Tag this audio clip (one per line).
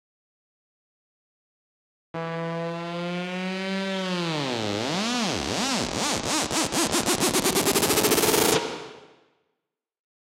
effect
FX
riser
sound-effect
soundeffect